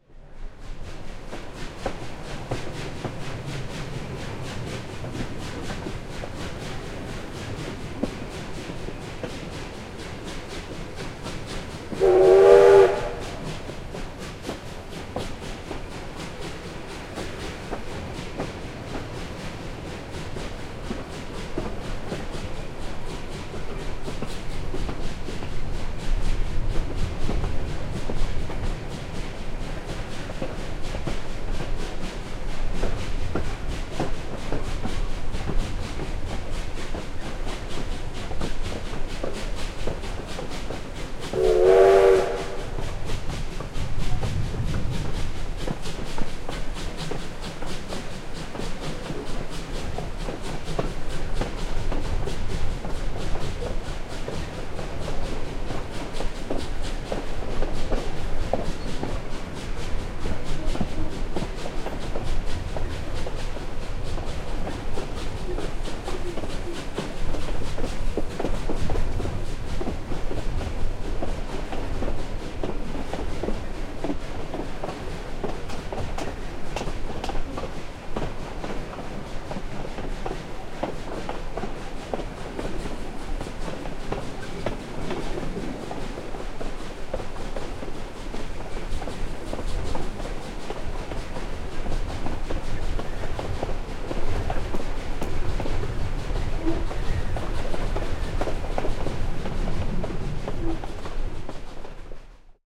Slow Moving Steam Train
Recorded onboard a steam train using a Zoom H4.
train, locomotive, passenger-train, rail, steam-train, railroad, railway